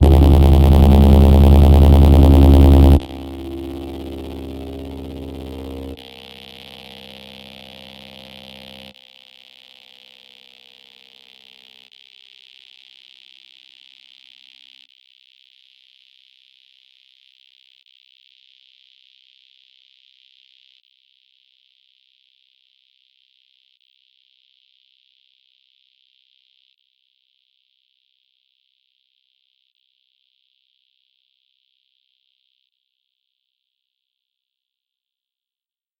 VIRAL FX 05 - C2 - SHARP HARMONIC SWEEP with fading high pass
Short sound with quite some harmonic content, a lot of square content, followed by a fading high pass delay. Created with RGC Z3TA+ VSTi within Cubase 5. The name of the key played on the keyboard is going from C1 till C6 and is in the name of the file.